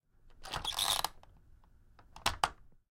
Squeaky metal bolt lock.